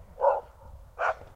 Ladrido de perro (Karel y David).
sonido
(Recording done by students of IES Baldiri (El Prat de Llobregat - Barcelona) during the workshops of field recording at different urban spaces of the city. Recording device: ZOOM H1).